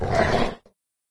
A dog growl from a labrador retriever
Big, Labrador-Retriever, Growl, Dog, Animal, Retriever, Labrador